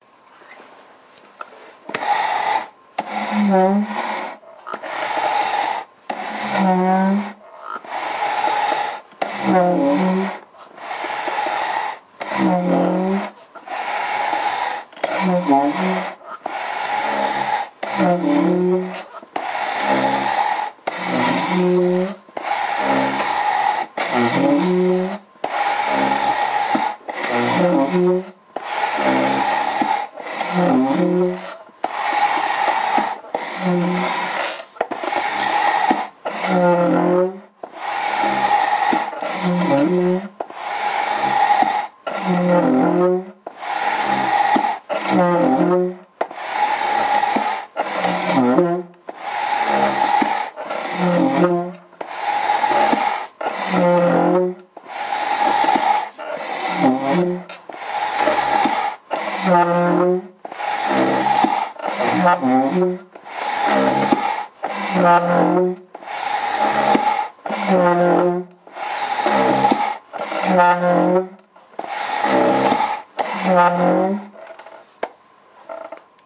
A wooden chair sliding backwards and forwards while being sat on over a tile floor with some mild background sounds such as a ticking clock